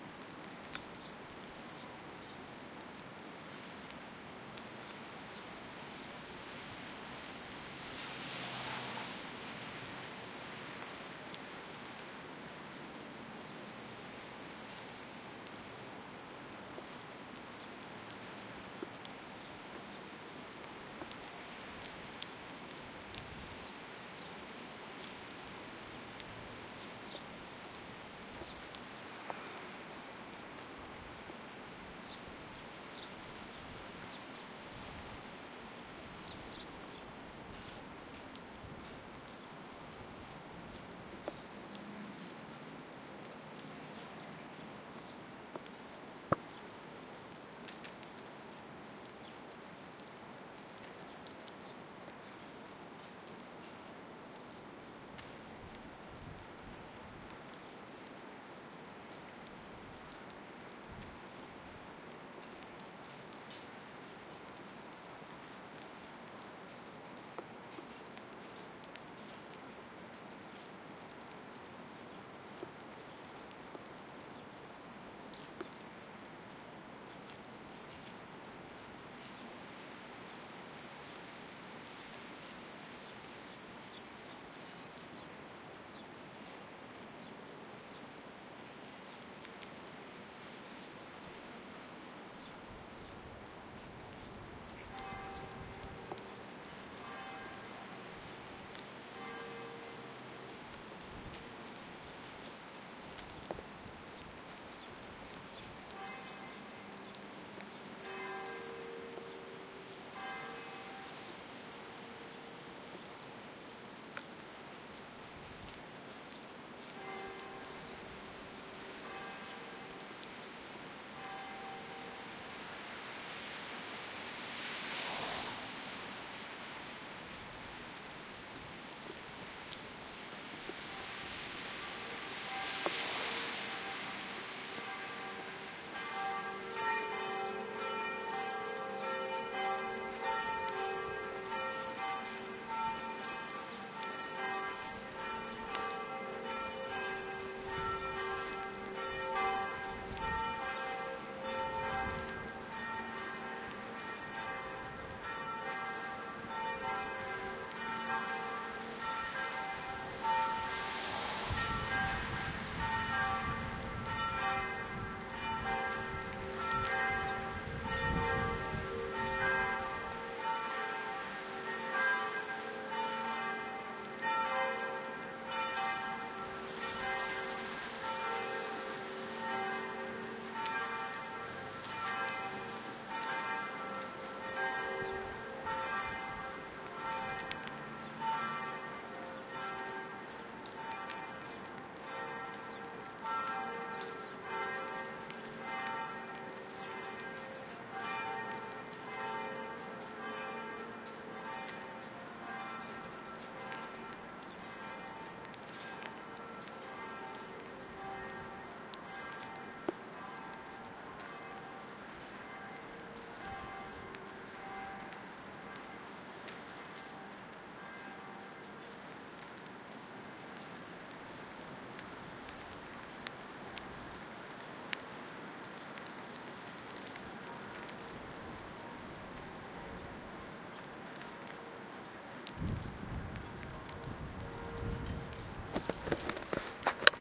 alley winter drippy +church bells Verdun, Montreal, Canada
bells, Montreal, church, winter, alley, drippy, Canada